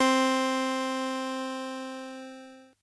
Preset Synth-Celesta C
Casio HZ-600 sample preset 80s synth